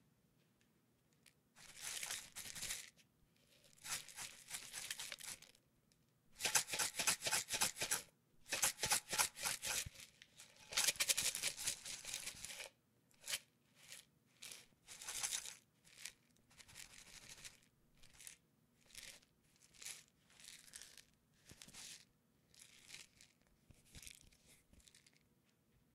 shaking can filled with oatmeal, grains, or other granules
Clean, dry recording of a roughly half-filled metal can (the ribbed kind used for most canned food - probably steel, tin, or a blend of the two) containing dry oatmeal being shaken. A variety of forces and speeds were used to create a diverse assortment of sounds. Originally recorded specifically for canned oatmeal sounds, but could easily work for shaken cans containing most kinds of tiny, dry granules such as rice, nuts, grains, etc. A metal can was used for the unique, metallic timbre it produces - a glass jar or plastic container would sound different.